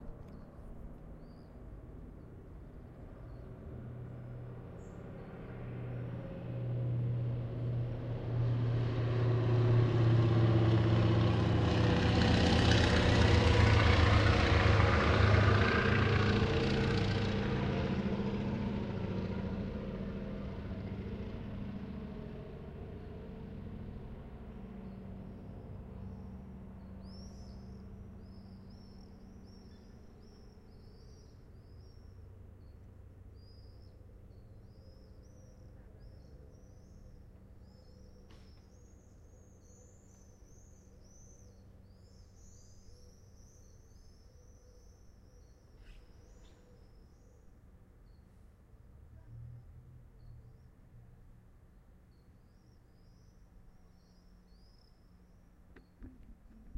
Low passing aeroplane, An-2R (HA-MEN), a bit farther
An-2R (HA-MEN) passing by quite low (biochemical anti-mosquito spray)
Distance: ~400 m
Recorded with Zoom H1, volume: 60
aeroplane, aircraft, airplane, antonov, fly-by, flyby, low-pass, plane